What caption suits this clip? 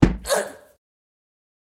Medium Impact Girl OS
Impact Female Voice